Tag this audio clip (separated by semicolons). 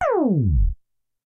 252basics; halt; porta; roland; screech; slow; stop; tape; xp-10